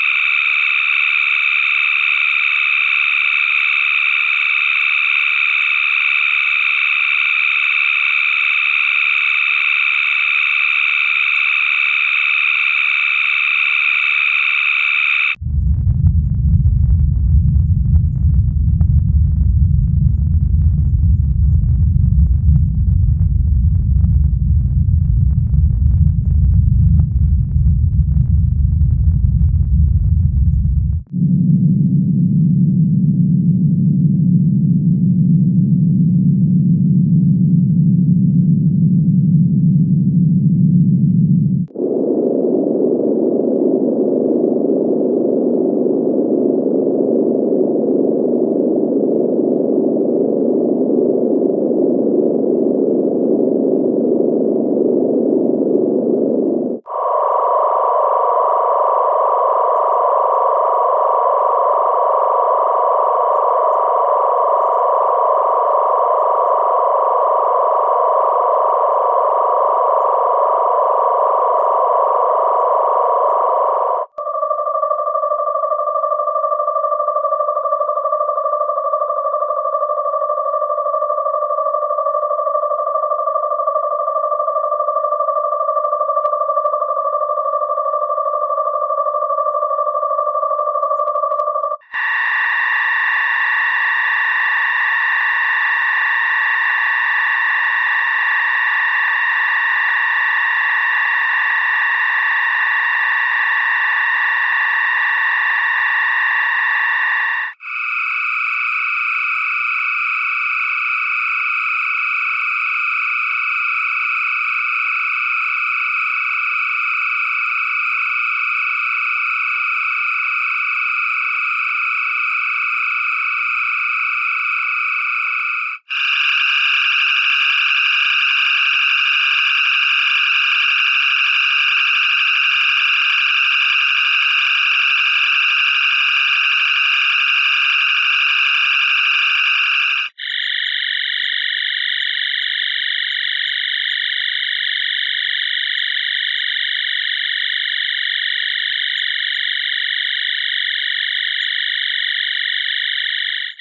Multiple sounds, some very different, all produced by the same Analog Box circuit. It was a poor attempt to recreate the Star Trek (original series) ship's phaser sound, which it can kind of come close to doing, but by changing the frequencies of some of the oscillators (etc.) you can get very different sounding noises out of it. So this is a long-ish sampling with a phaser-like sound, a ship-background-ambiance sort of sound, some equipment humming sounds, and other sci-fi unexplainable weirdities, maybe even sounds from giant insect creatures from outer space, I dunno. Sorry I didn't take the time to split these up, or to create loops, but if I had to do that with all these variations in this and the other files, I would never get around to posting them. So you can do that extraction yourself.